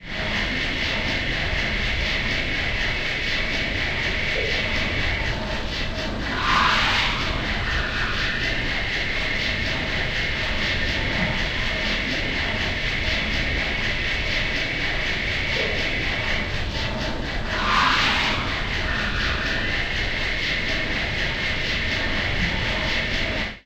A factory sound simulated with brush-teeth sound loop samples. Processed with DSP-Quattro X.